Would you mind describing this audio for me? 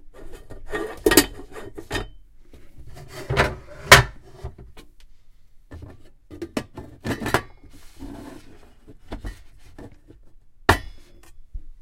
Pots and pans brutally wrestling each other for the glory of the Kitchen God. Just kidding, it's just me hitting them against each other.